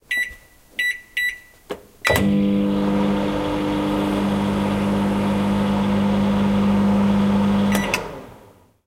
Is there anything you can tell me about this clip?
Microwave Oven Sharp
This is a Sharp Microwave Oven being programmed (beep beep) and then stopped. It was recorded in stereo (not that a microwave has a lot of stereo information in it) using a Rode NT4 plugged into the mic-in on an Edirol R-09.
field-recording, domestic, buttons, beep, electro-mechanical, microwave-oven, electronics, kitchen, heat